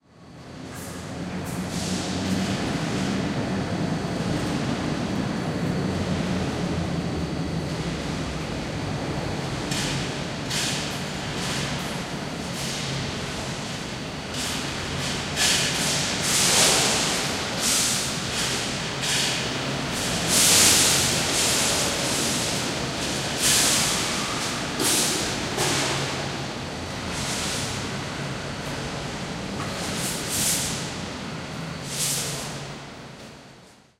steel factory006
Unprocessed stereo recording in a steel factory.
industrial, noise